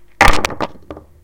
rock collisions
Sound recording of rocks colliding together.